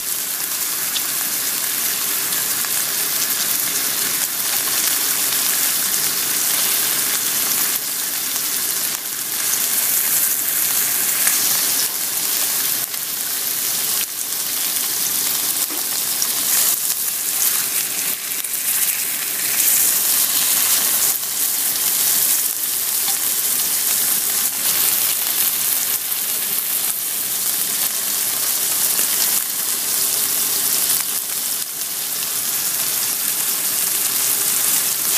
Burger Fry
Tasty! Burger sizzling away in a pan, I occasionally move it slightly.
frying, fry, cook, sizzle, food, burger, sizzling